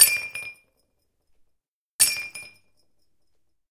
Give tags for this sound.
breaking floor glass ortf glasses dropping xy falling

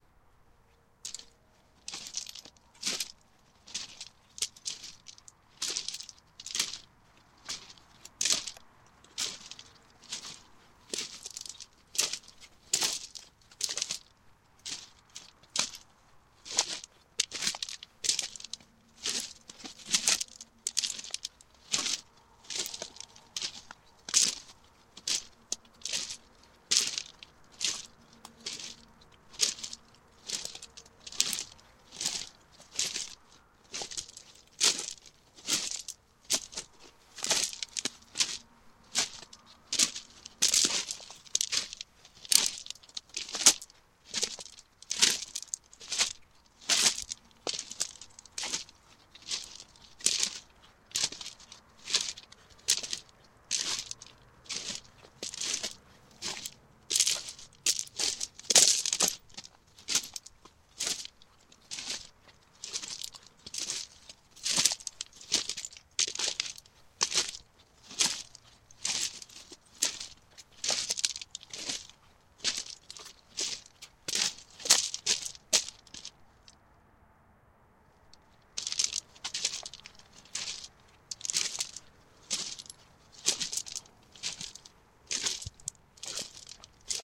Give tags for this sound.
comfortable steps stones

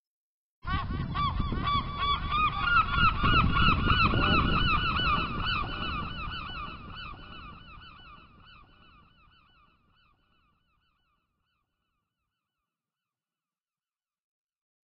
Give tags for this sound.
sea gulls shouting trippy dreamy chirping gull calling yelling yapping delay echo bird whitby seagulls ocean seagull

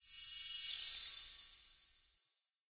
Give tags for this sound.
chimes swish titles shimmer whoosh reverse

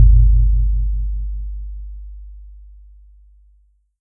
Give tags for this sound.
lowkey low bass

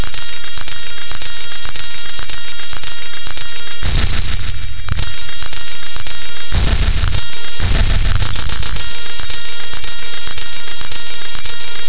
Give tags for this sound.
electronic fubar noise processed

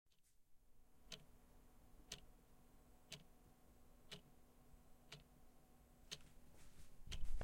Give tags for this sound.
ticking sound anxiety clock tick-tack

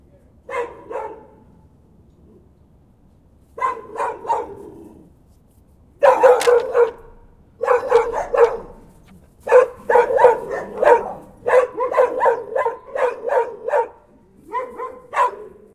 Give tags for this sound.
Dogs Bark Kennel Barking

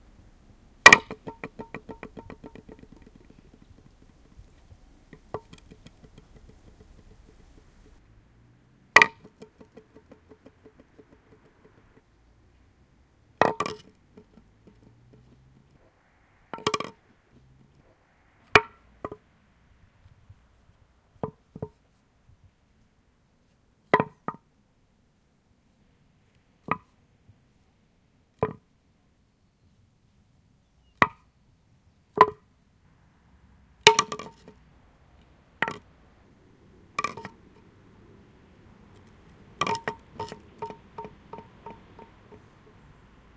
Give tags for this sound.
adpp blanket drop stone

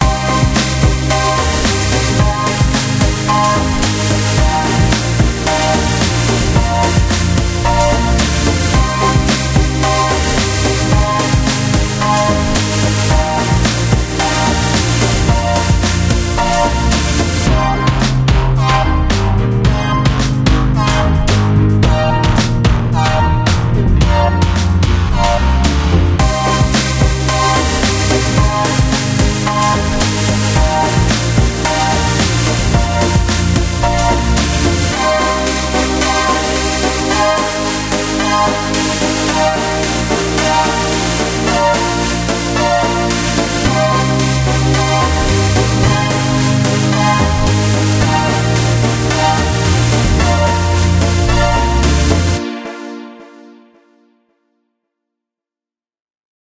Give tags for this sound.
bass drum beat City night upbeat dance synth action drums electronic loop techno edm electro happy synths

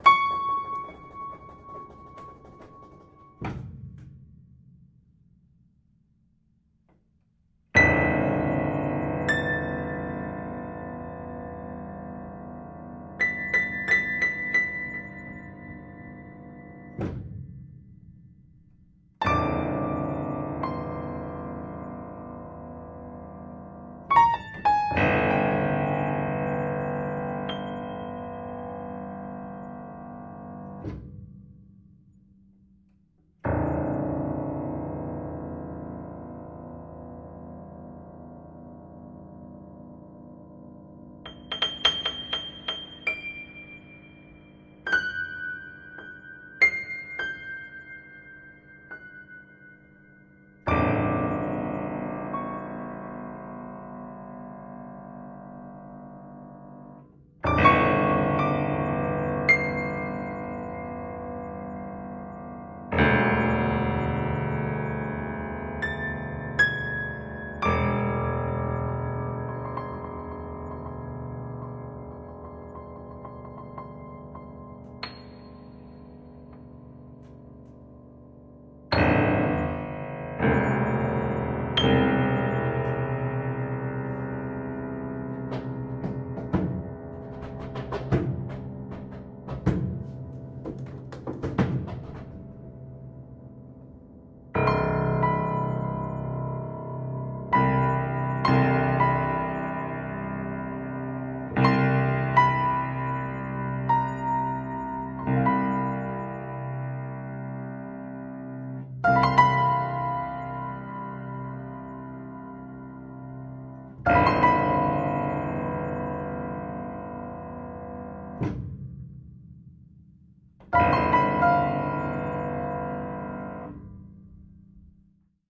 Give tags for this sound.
close-mic dark experimental improvisation Rode-NT4 small-room upright-piano xy-stereo

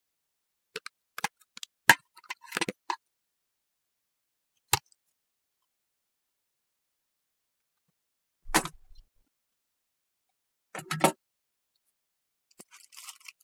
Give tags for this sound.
mono plastic

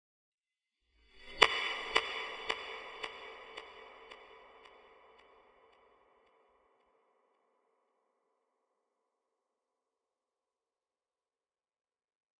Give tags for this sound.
effects fl foley rise

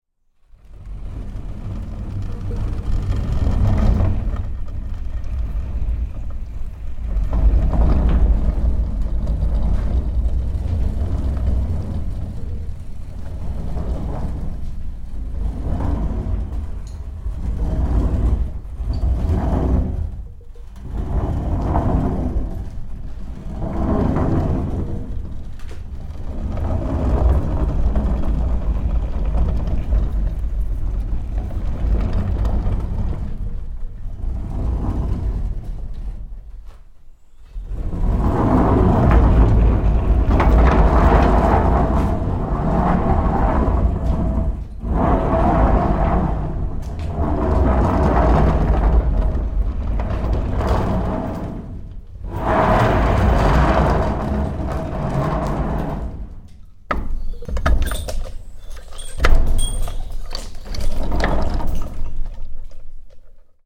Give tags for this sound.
Sound-effect Chain Pulley

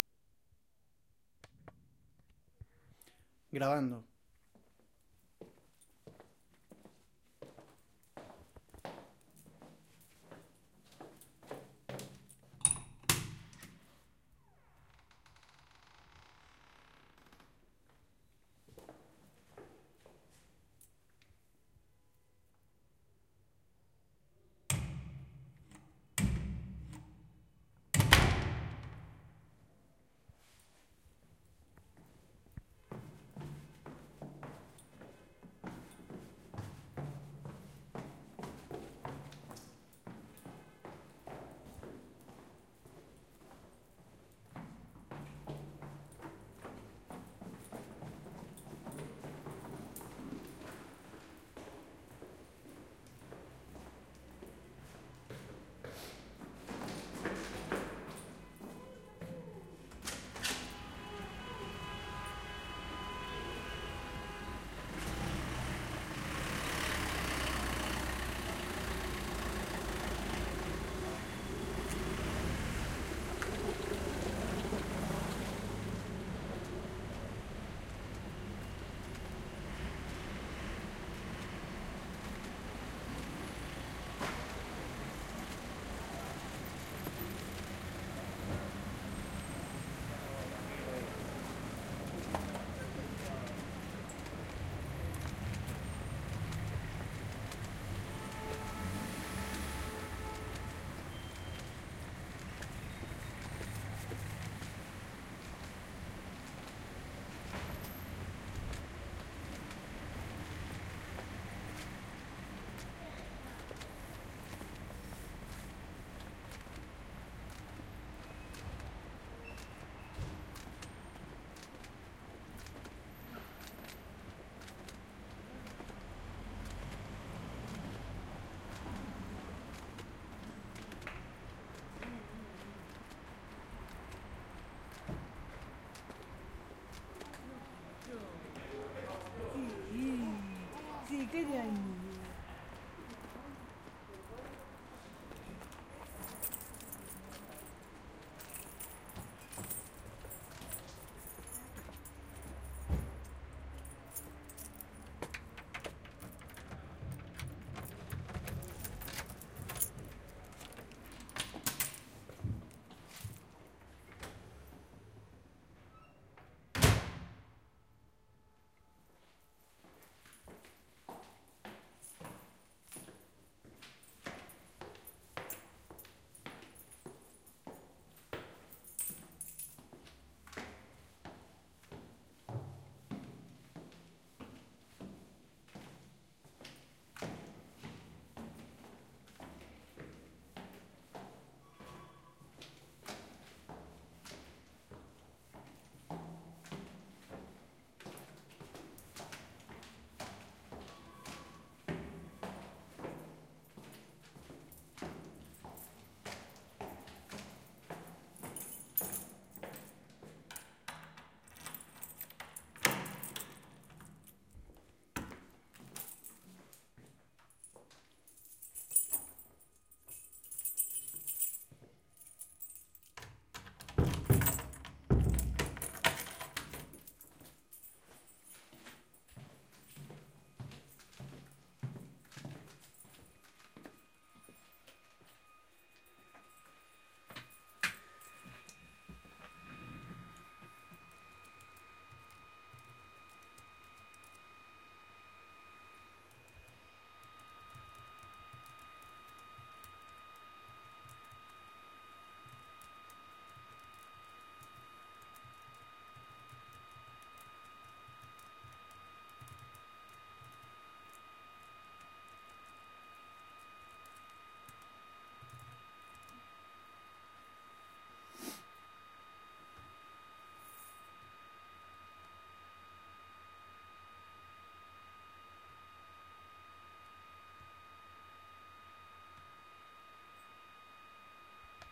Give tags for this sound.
doppler ambience steps key street city recording field car door

